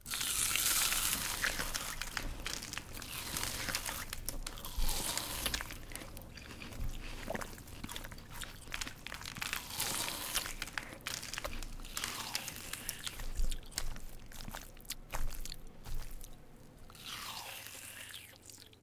Zombie Eat
Put together for a radio format pitch, sounds delicious.
squelch, horror, zombie, spaghetti, soundscape, gross, brains, sticky, gore